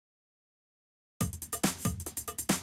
news music
chillout, club, dj, downbeat, downtempo, drum, drum-loop, filter, hardcore, hip, hip-hop, hiphop, phat, producer, slow